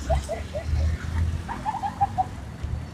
Registro de paisaje sonoro para el proyecto SIAS UAN en la ciudad de Palmira.
registro realizado como Toma No 05-risas parque de los bomberos.
Registro realizado por Juan Carlos Floyd Llanos con un Iphone 6 entre las 11:30 am y 12:00m el dia 21 de noviembre de 2.019
05, No, Of, Palmira, Proyect, SIAS, Sonoro, Sounds, Toma